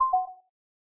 a user interface sound for a game